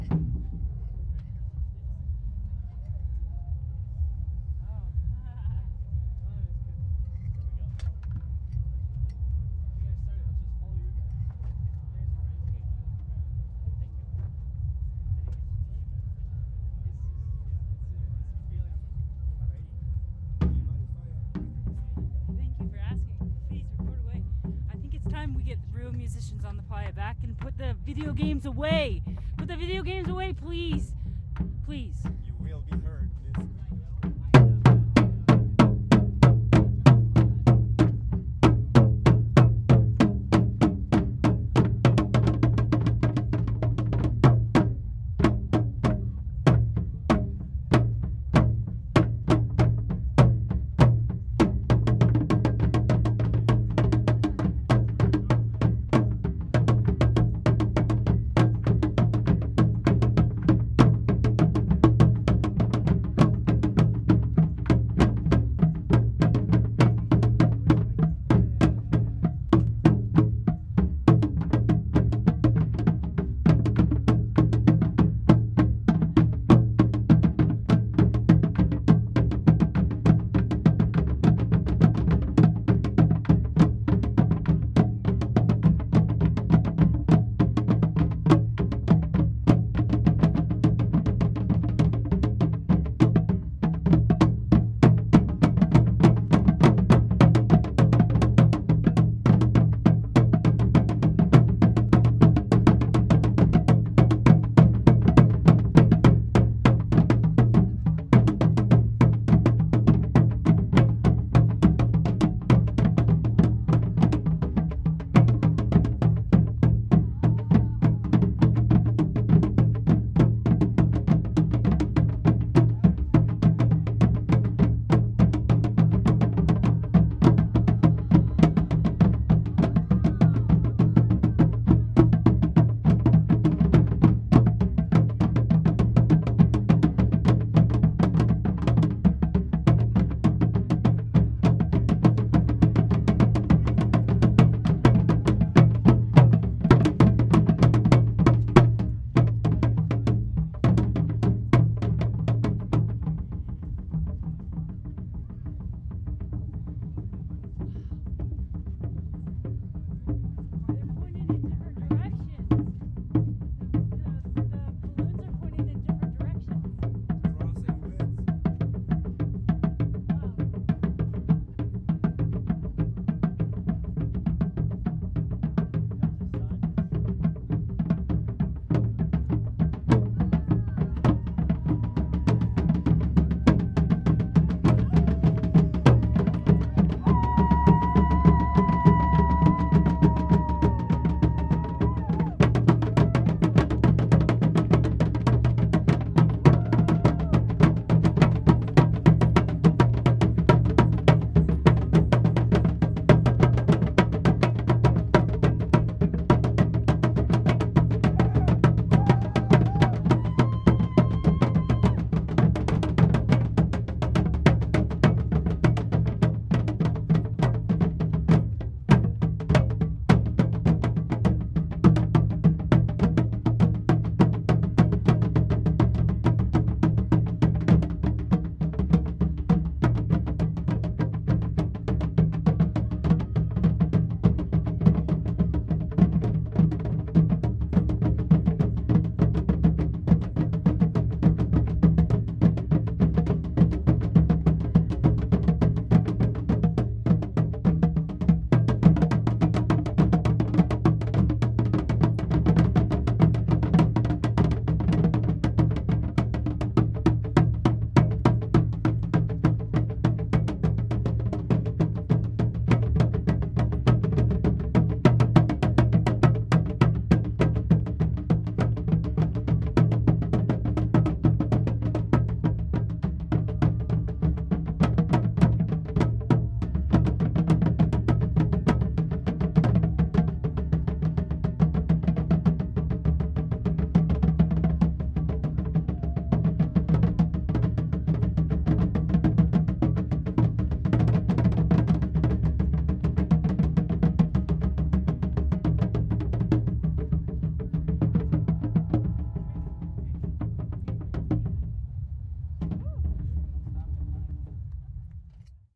sunrise drums burningman 2013
Trio of drummers playing, right at the crack of dawn, near the temple.
Burning, Drummers, Drums, howls, man, playa, sunrise